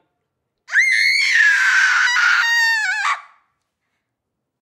Terror scream: grito terror
agony, Girl, Horror, pain, scream, Terror, torment